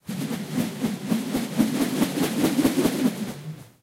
Rope spin L4
spinning larger rope, medium duration